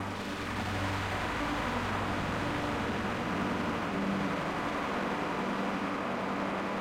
Digital Texture 04
original name: alien airport
Josh Goulding, Experimental sound effects from melbourne australia.
alien, effect, space, techno